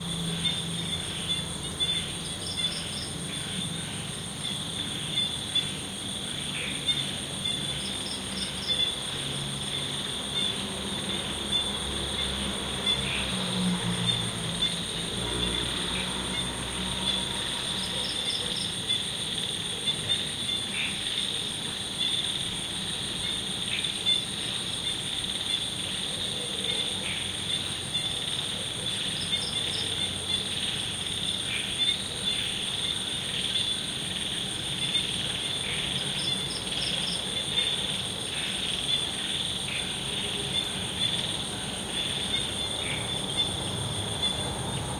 asian night sounds 2
recorded in Thailand with ZOOM H4N
nature, jungle, forest, field-recording, frog, bugs, bog, thailand